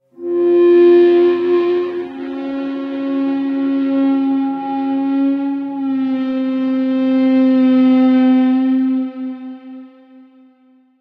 The Witch house 3
Hello friends!
HQ dark ambient pad. Best used for horror movie, game dark scene etc.
Just download!
Enjoy! And best wishes to all indie developers!
ambience, ambient, atmosphere, cinematic, dark, drama, drone, film, horror, pad, scary, sinister, soundesign, synth, terror